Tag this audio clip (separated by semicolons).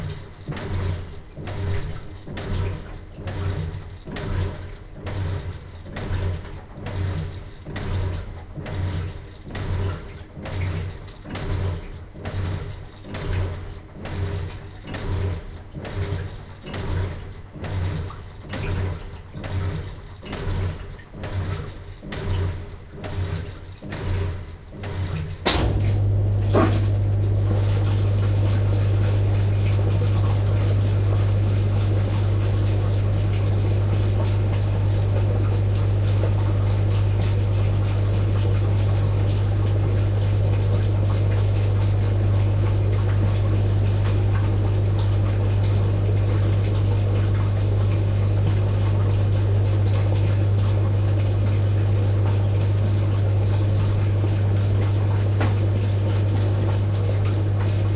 home; household; indoors; laundry; washing-machine